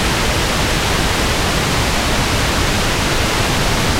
Moog Minimoog Pink Noise
Just plain noise. Use this to modulate analog gear or similar.
minimoog, moog, noise, pink